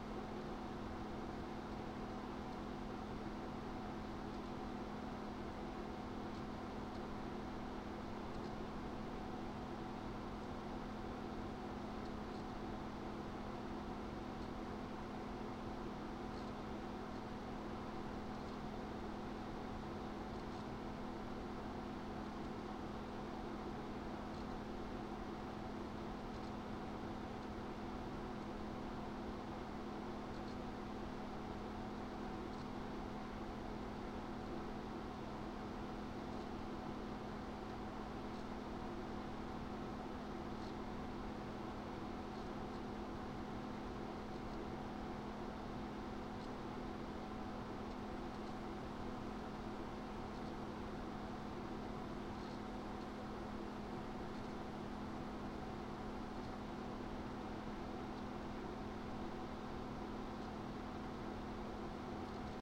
The sound of my HP dc7700 desktop not doing much
fans, computer, idle, machine